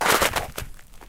Footstep in the snow 02 [RAW]
Raw and dirty recording of my own footstep in the snow.
Captured using a Zoom H5 recorder and the included XY-capsule.
No post-processing has been applied.
Cut in ocenaudio.
Enjoy. ;-)
crunch, footstep, footsteps, frozen, raw, recording, snow, step